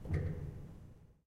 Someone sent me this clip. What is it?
Pedal 19-16bit
piano, ambience, pedal, hammer, keys, pedal-press, bench, piano-bench, noise, background, creaks, stereo
ambience, background, bench, creaks, hammer, keys, noise, pedal, pedal-press, piano, piano-bench, stereo